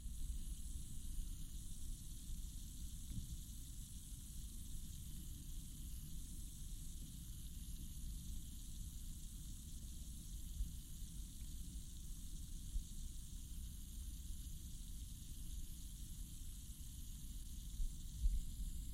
Fabric Wetting
wet, fabric